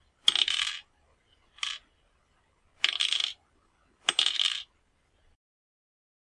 Anillo Saltando
Ring knocking on the floor
Bell, Ding, Ting